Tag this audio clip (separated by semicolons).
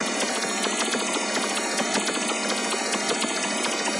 dance; sound; space